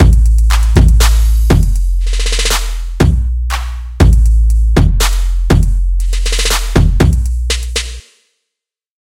just a trap beat:)